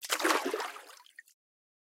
water swimming 4
Recording of swimming.
Since the Sony IC Recorder only records in mono, I layered 3 separate splashes sounds(1 left, 1 right, 1 center) to achieve a fake stereo sound. Processed in FL Studio's Edision.
sony-ic-recorder, layered, water, pool, wave, swimming